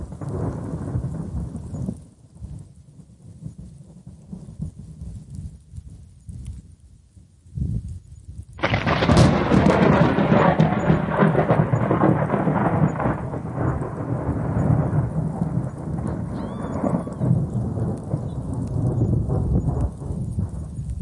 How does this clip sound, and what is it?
compressed thunder clap
The same as my original thunder crash but 4.5/1 compression gives it some fullness.